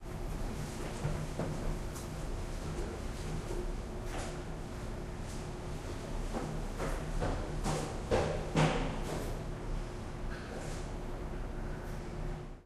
Sounds recorded while creating impulse responses with the DS-40.
ambiance, field-recording